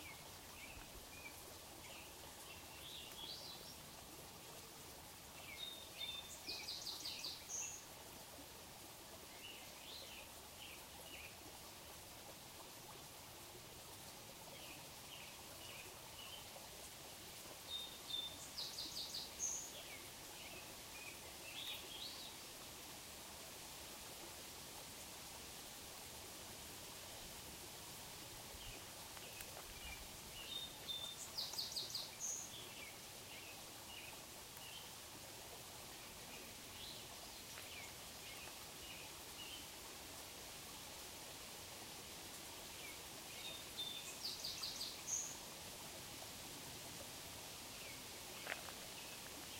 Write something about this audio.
tlf-birds singing 04
ambience, bird, birds, birdsong, field-recording, trail